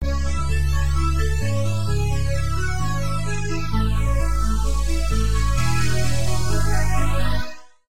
southern synth rap loop
Im more into making Northern HipHop Beats. Here is an old clip of what was an attempt to create southern rap.
loop, rap, hiphop, Synth, southern, music, keyboard